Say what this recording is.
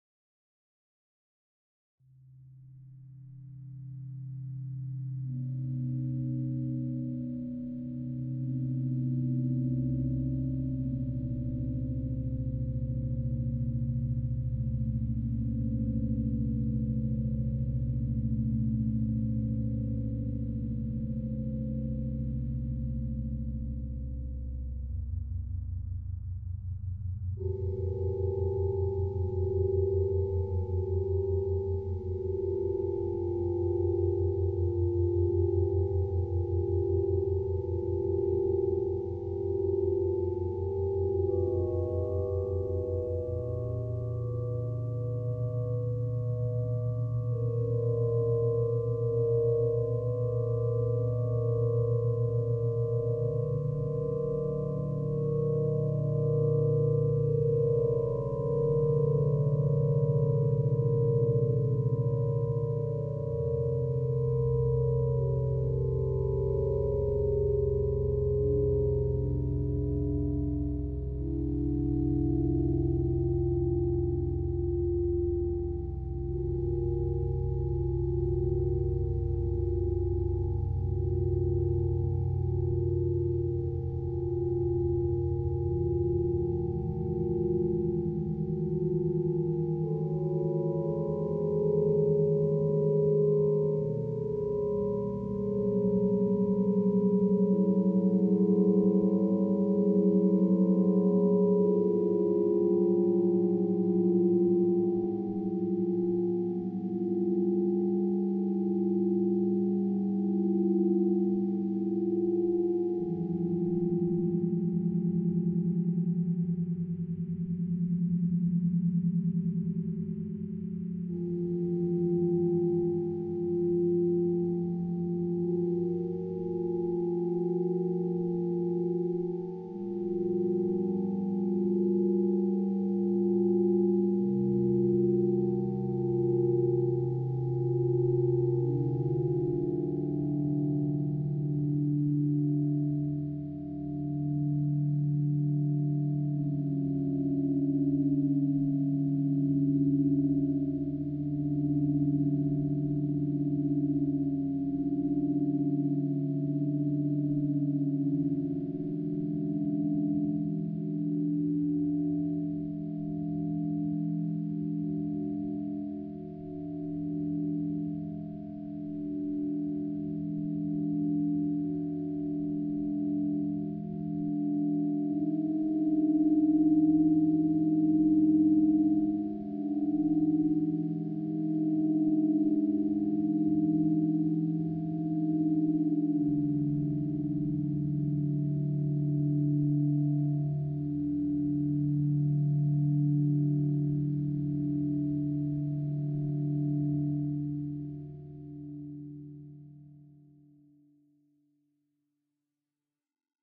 Resonant Metallic Drone
A slowly evolving, resonant, metallic drone, derived from recordings of struck metal pipes, processed with granular synthesis and time stretching.
ambient, drone, evolving, granular, metallic, resonant, smooth, soundscape, space